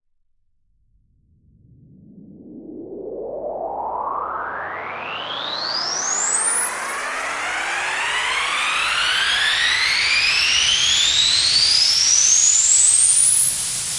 Riser made with Massive in Reaper. Eight bars long.